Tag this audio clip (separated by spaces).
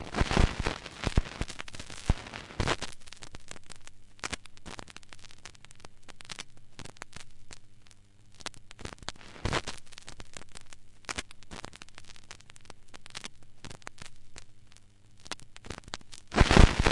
noise vinyl